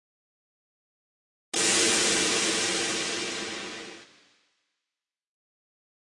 Steam sound made from scratch in Reaper.
noise, train, Steam, factory